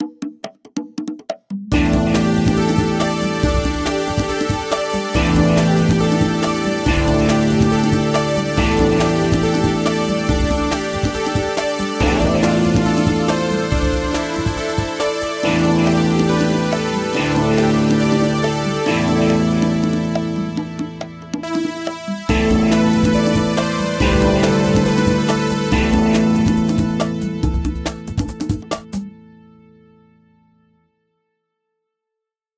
sudden run
A short and simple music track suitable for using as an action soundtrack to an animation!
simple, music, track, free, action, toon, animation, short, orchestra, inspirational, easy, soundtrack, run, strings, fast, sudden, character, cartoon, synth, light